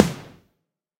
HAIR ROCK SNARE 002
Processed real snare drums from various sources. This snare sample has lots of processing and partials to create a huge sound reminiscent of eighties "hair rock" records.